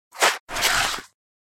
Another 1 taken bits and pieces from 189230__starvolt__shuffling-3-front and it sounded oddly like shoveling somehow...
shovel, shoveling, removing, excavation, excavate